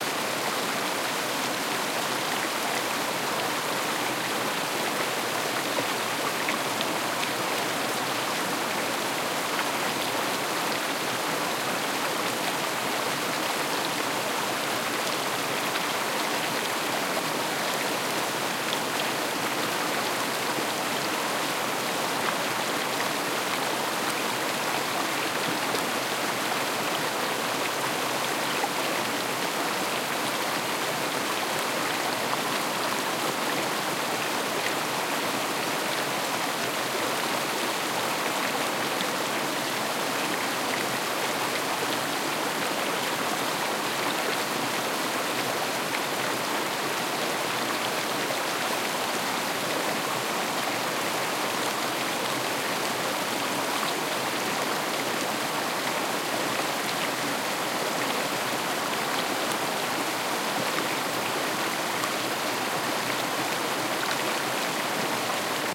Mountain River
Noise of a small river in the mountains. Please write in the comments where you used this sound. Thanks!
creek, current, field-recording, flow, nature, river, stones, stream, water, waterfall